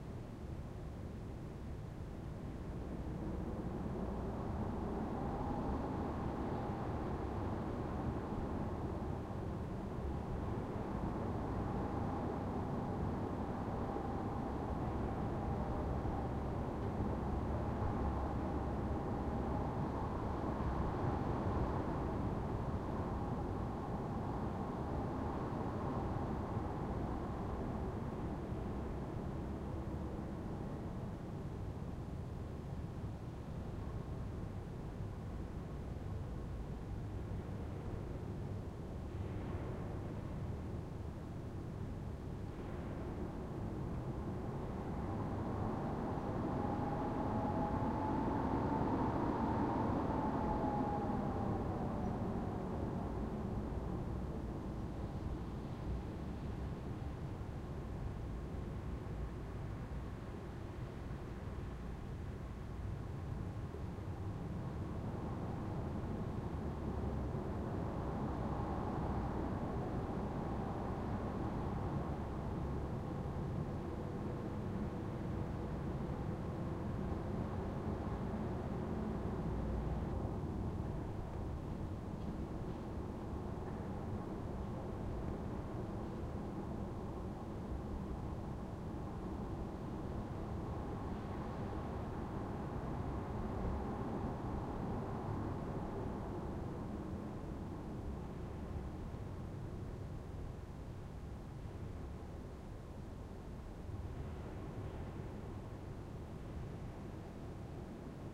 Howling wind recorded close to a window inside of an empty church in winter.
ambience, atmosphere, blowing, howling, wind, window